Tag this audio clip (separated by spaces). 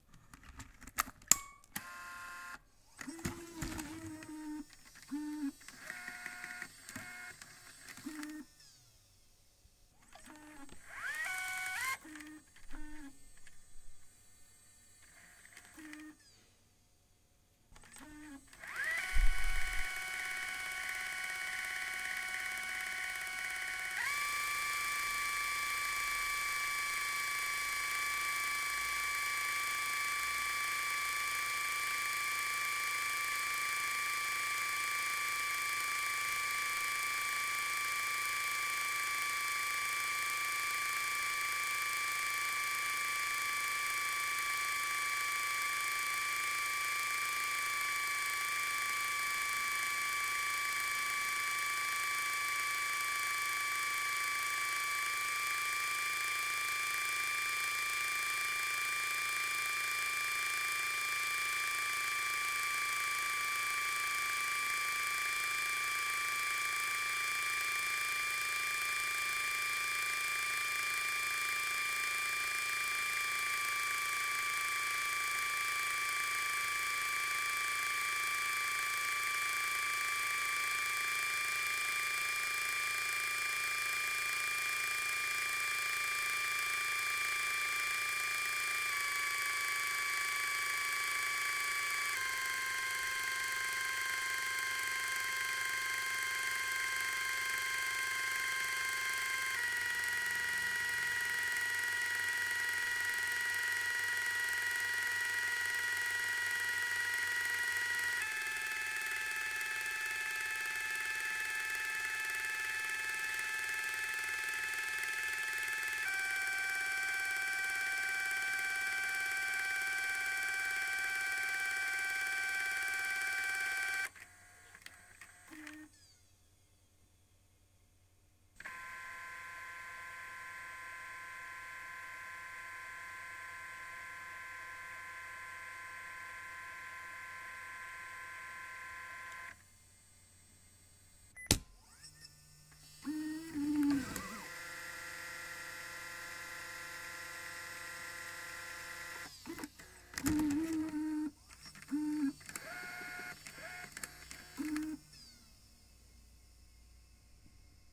90s cam camcorder camera cassette DVCAM flash forward heads MiniDV pause play rewind stop tape video